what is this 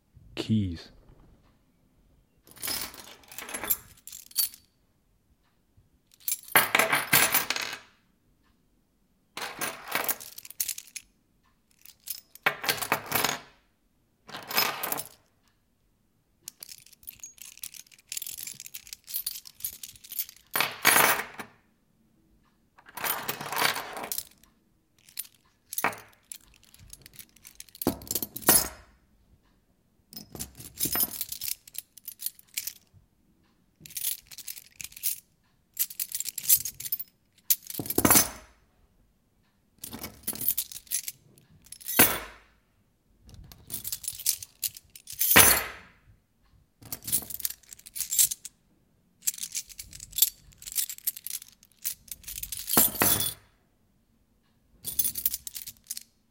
Keys in hands and on table
Sound of keys throwing on the table and taking.
chair, clang, cling, hit, holz, impact, iron, key, keychain, keyring, keys, metal, metall, metallic, percussion, rattle, schl, ssel, steel, stuhl, table, ting, tisch, wood, wooden